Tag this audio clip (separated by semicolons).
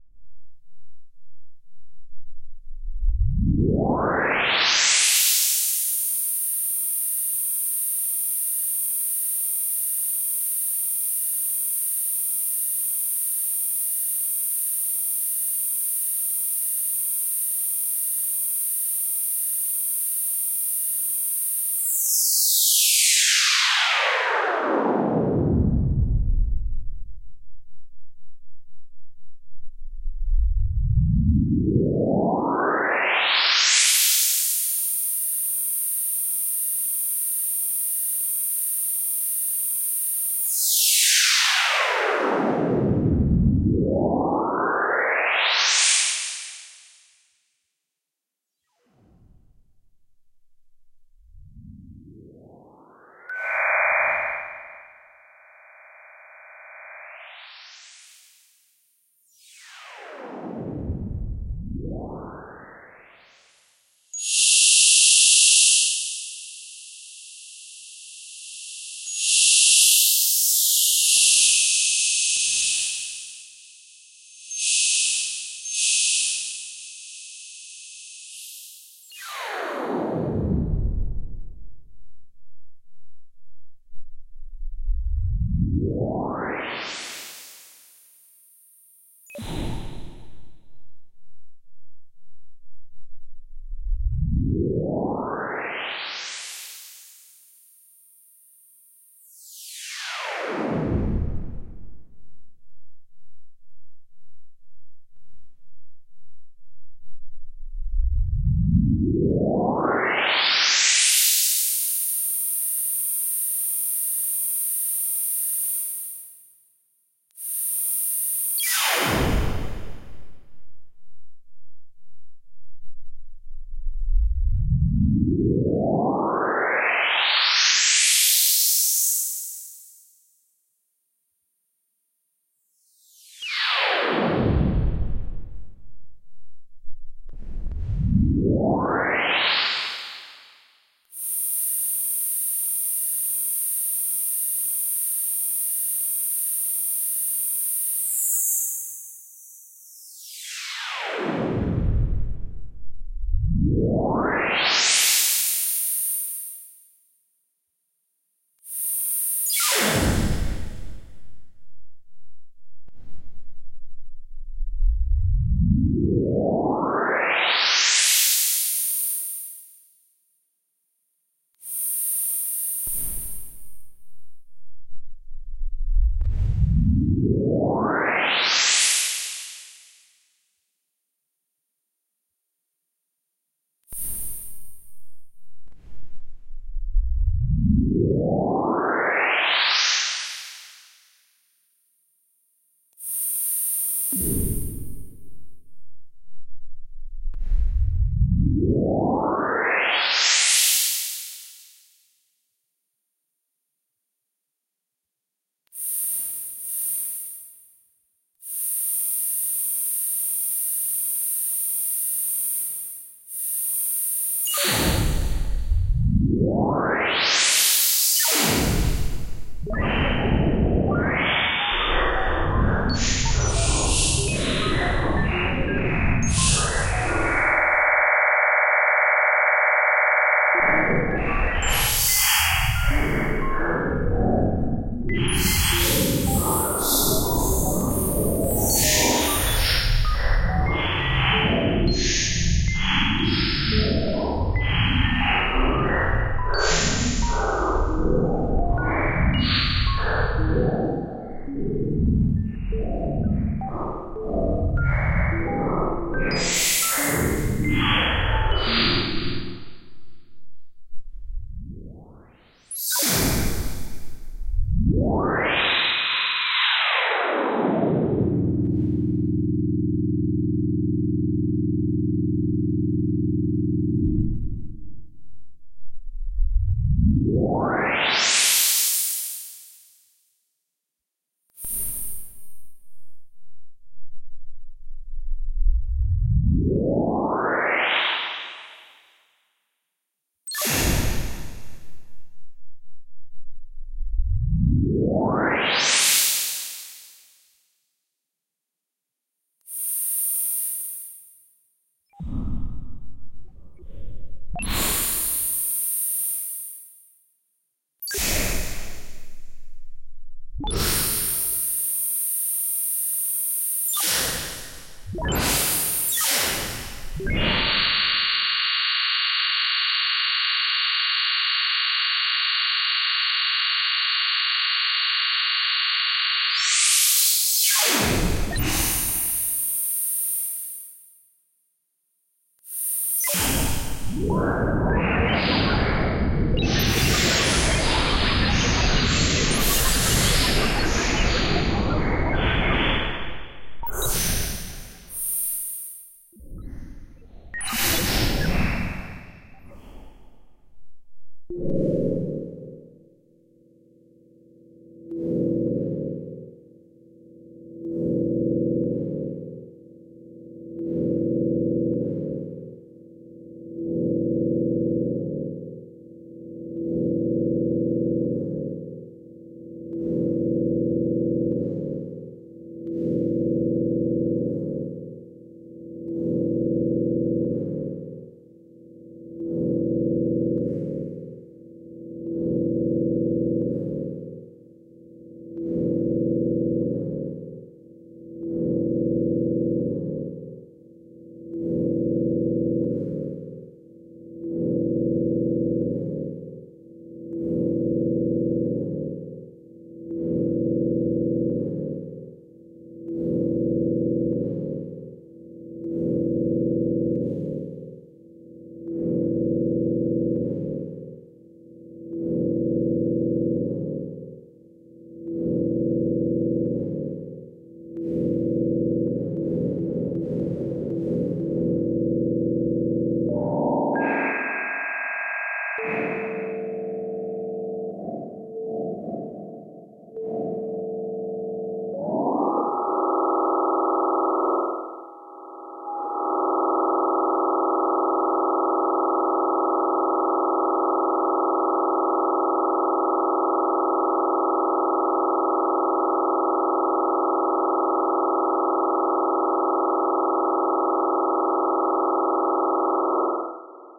sci; alien